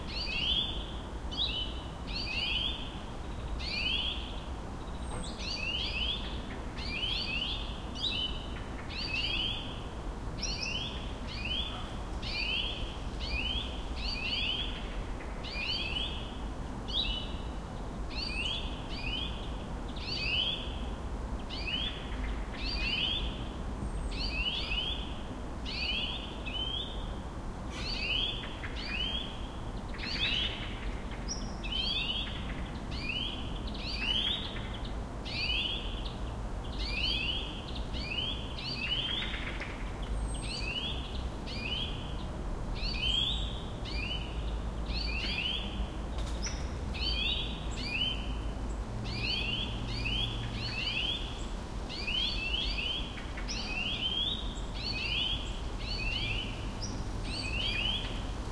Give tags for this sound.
city birds field-recording

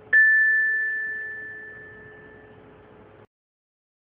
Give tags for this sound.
hit metal

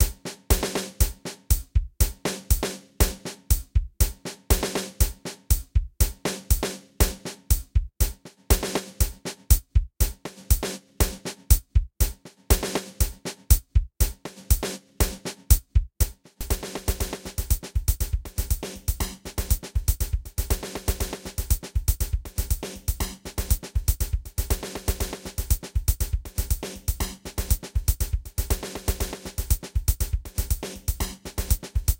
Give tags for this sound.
delay drums echo effect gate gated gated-drums processed vst

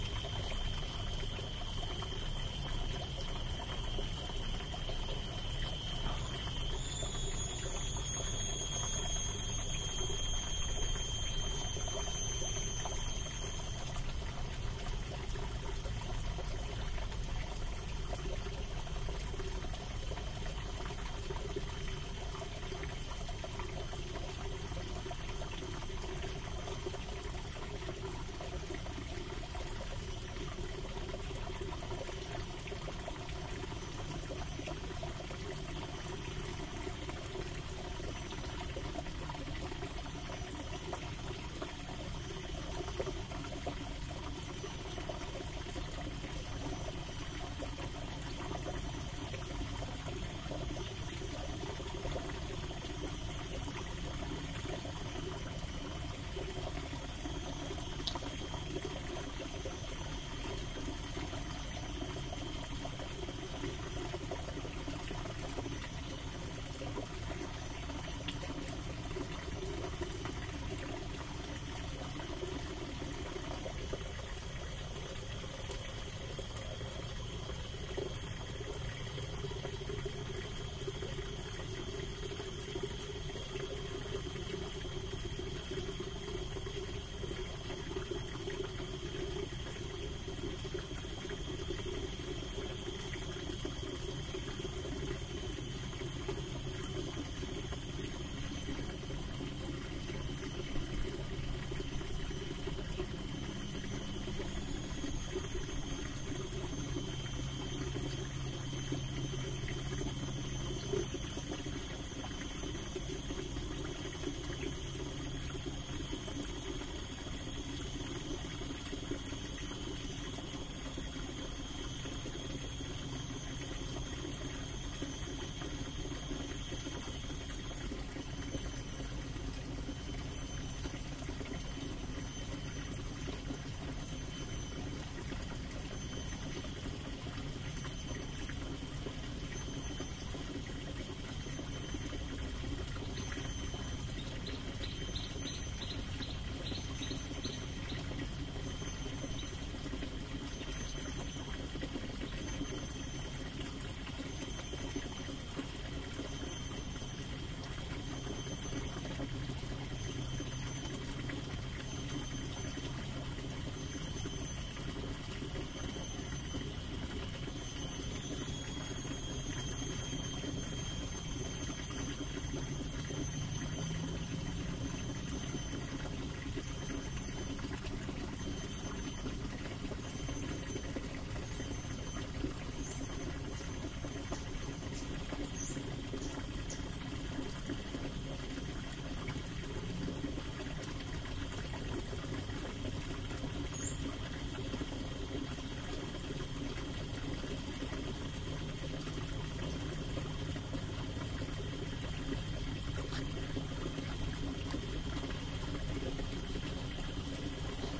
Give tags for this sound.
ambience Borneo cicadas exotic field-recording insects jungle loud Malaysia rainforest stream tropical water

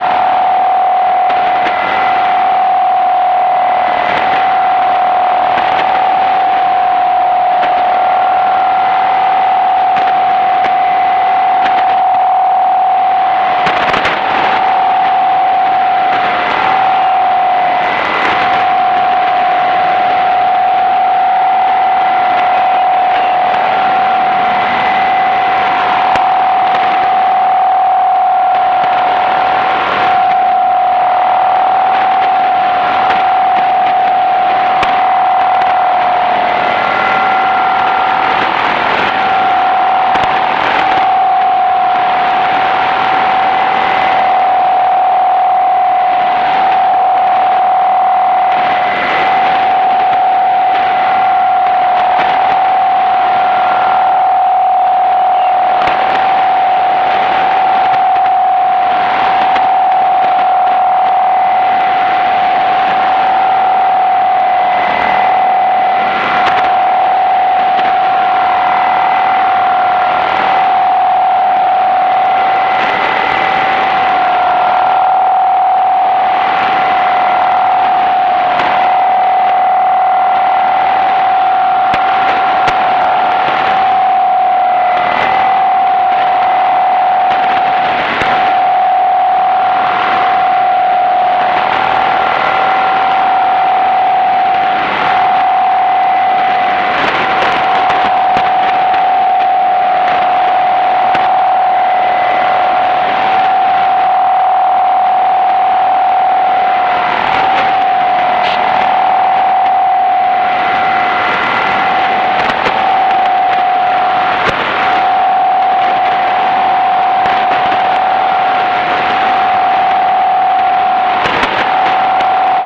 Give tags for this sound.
dxing electronic noise radio short-wave shortwave static

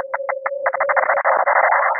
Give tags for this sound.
Thalamus-Lab processed image synthesized

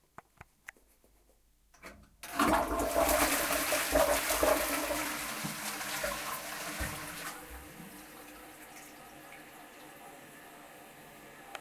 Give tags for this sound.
sonsdebarcelona barcelona sonicsnaps doctor-puigvert spain

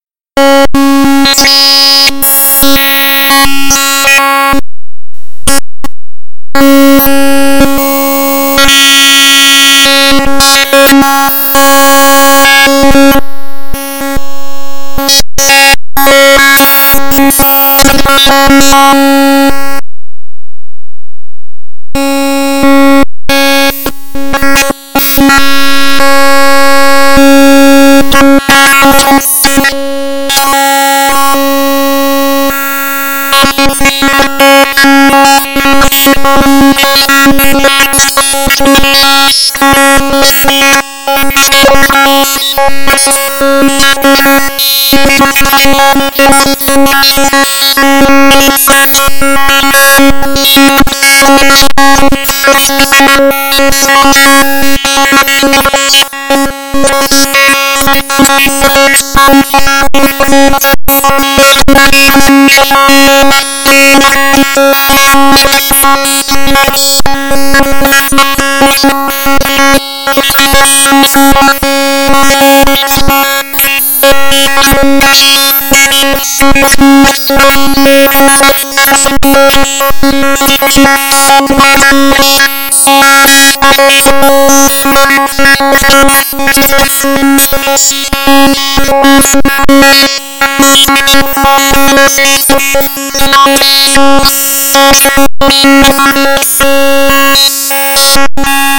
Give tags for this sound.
annoying audacity electronic raw processed noise data sound-experiment static raw-data computer glitch

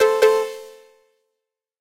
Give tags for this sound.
abstract alarm beep button computer digital freaky push resonancen sound-design splash typing weird

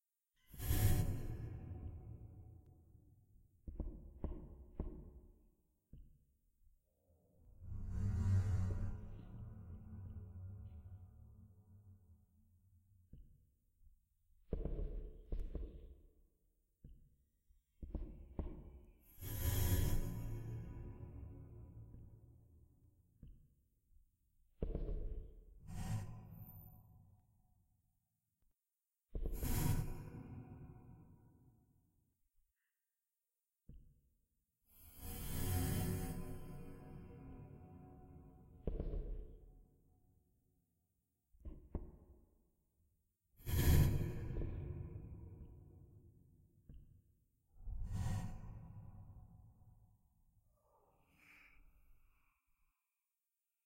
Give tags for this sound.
ambient background-sound creepy dark Gothic horror phantom scary spooky terror

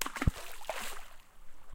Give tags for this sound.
splash nature water

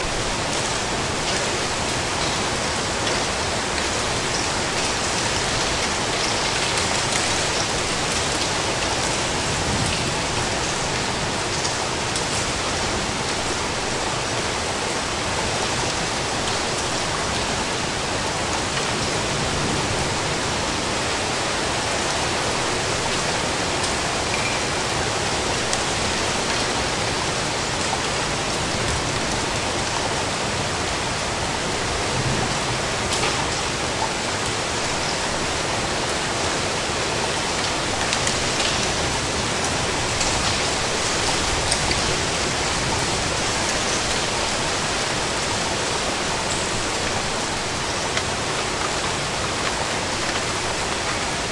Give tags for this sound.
urban europe hagel corn collision korn weather zoom fall germany shower storm berlin courtyard rain thunder h2 spontaneous hail

